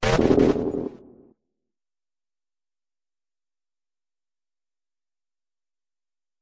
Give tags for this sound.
effects FX Gameaudio indiegame SFX sound-desing Sounds

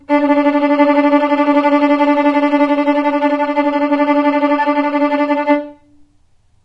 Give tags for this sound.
violin tremolo

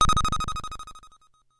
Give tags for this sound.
analog fx lfo monotribe percussion

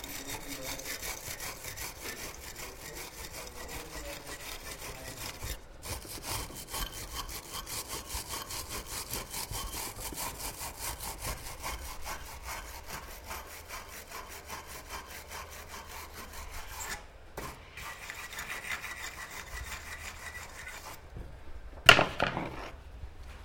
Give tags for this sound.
bench brush effect foley hardware metal scrape scrub sfx shed sound steel tool toolbox tools work workshop

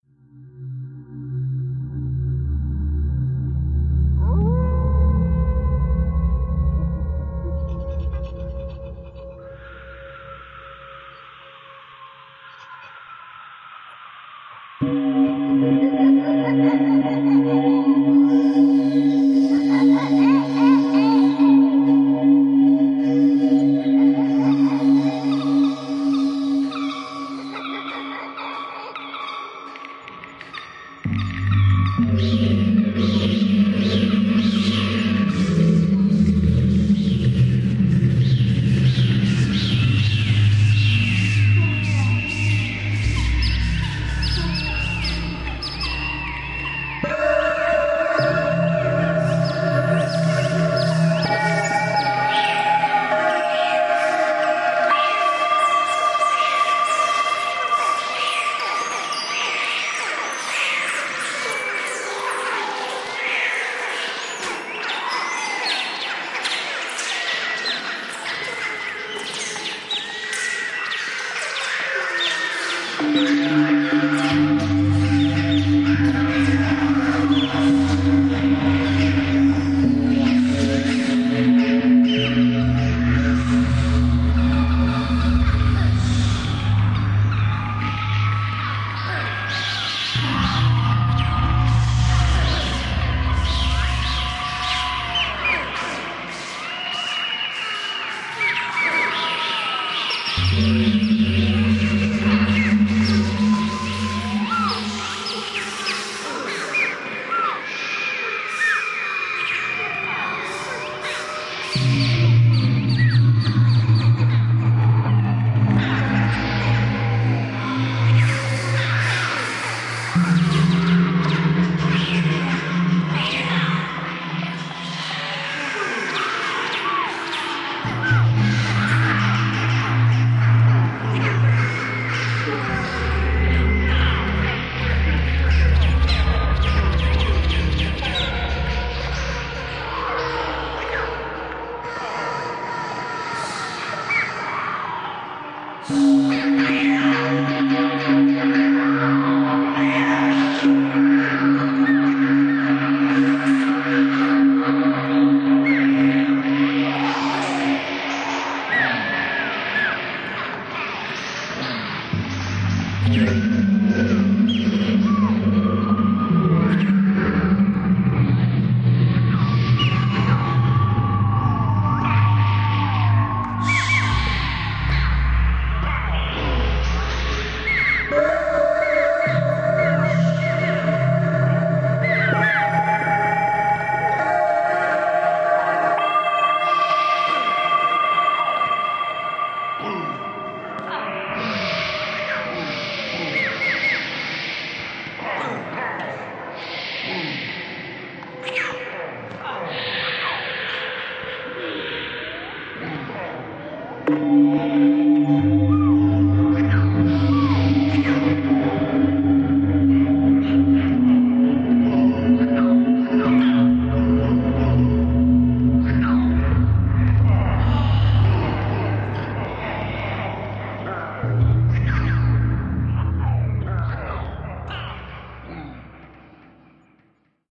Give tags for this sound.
future radio sounds space star SUN wave

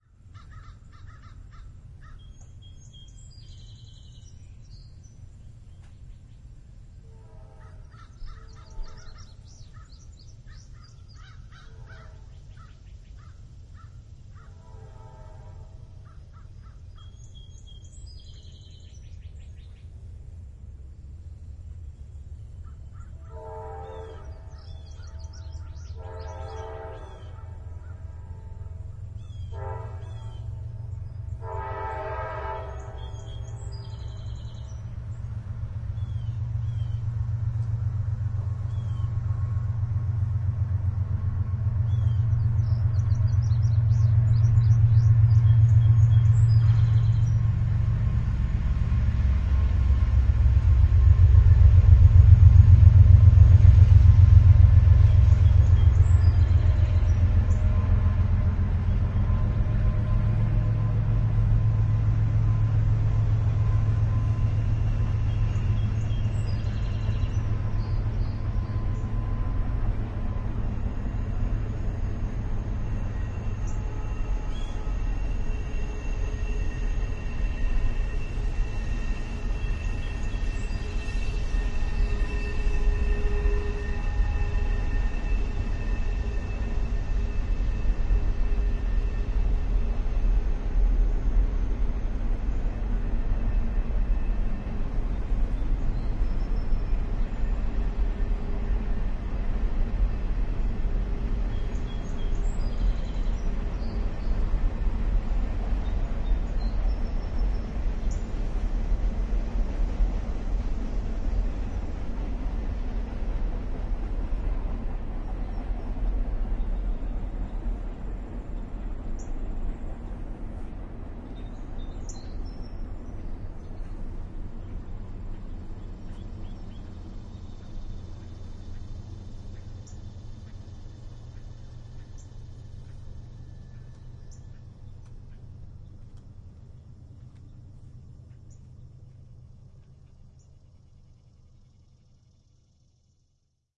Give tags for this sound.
locomotive whistle

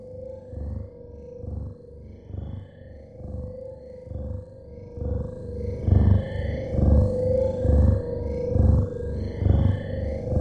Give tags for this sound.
ascending down electromagnetic Fantastic out pulses Radar rising sending up waves

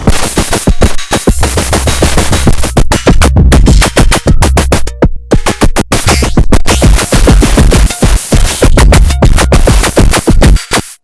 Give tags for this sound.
idm kat ambient micron glitch electro synth base beats chords bass leftfield alesis acid

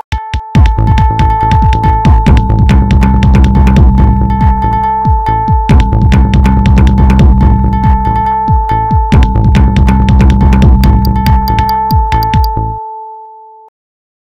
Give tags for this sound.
beat drums garbage improvised loop mistery music percussion-loop synth

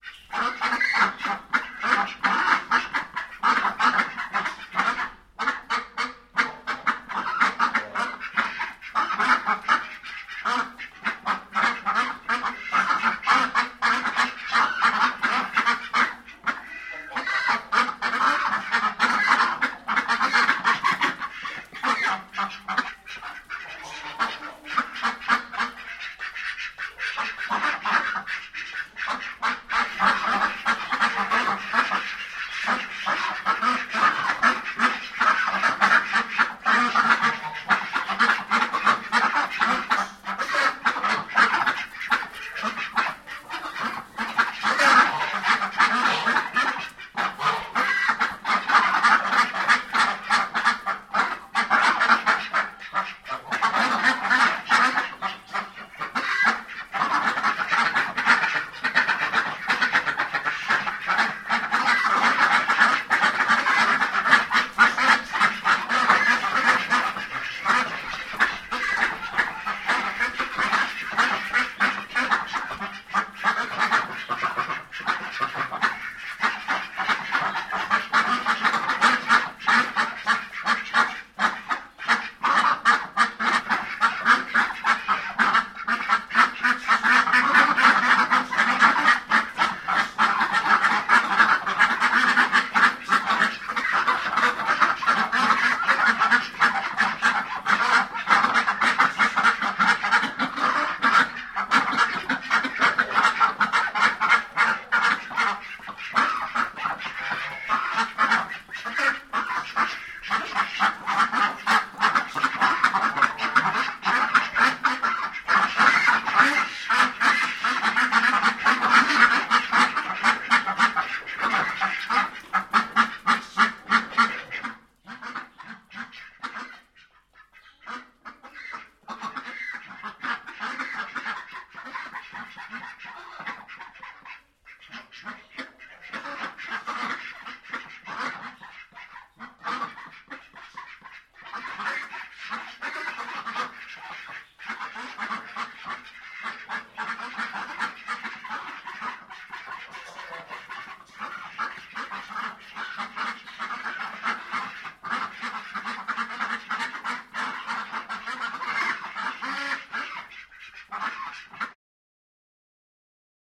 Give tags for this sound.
barn birds ducks farm quack

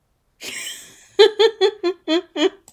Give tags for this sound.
laugh joy funny happiness jolly laughing humor humour laughter giggle

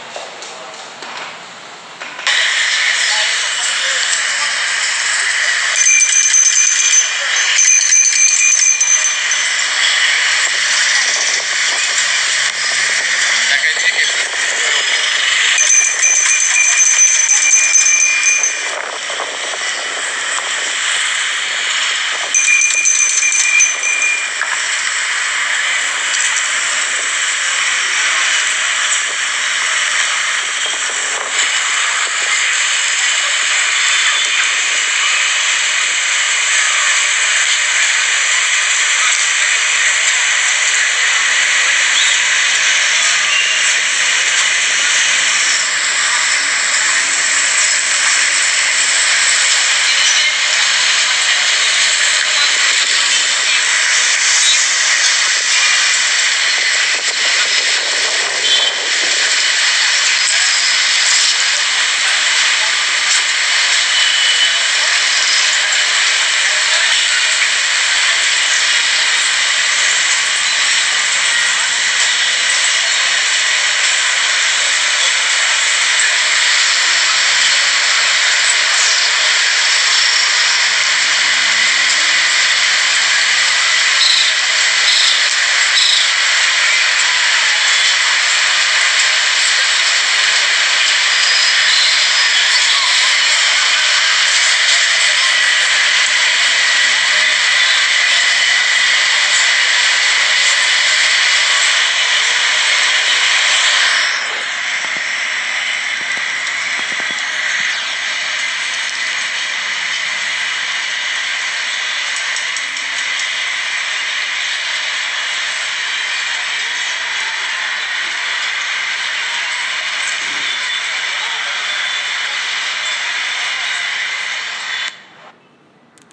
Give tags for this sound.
registro sonoro Bucaramanga prueba SIAS Paisaje

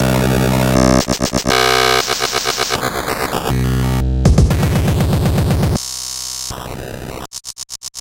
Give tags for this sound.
computer drum robot

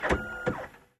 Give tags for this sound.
268791 electronic machine machinery mechanical printer robot robotic servo

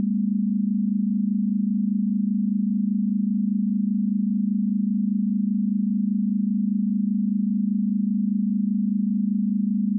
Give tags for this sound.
test pythagorean signal ratio chord

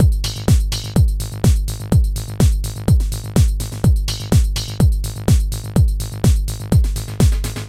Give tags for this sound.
house italy beat 125bpm chilled-house dance bassy four-on-the-floor

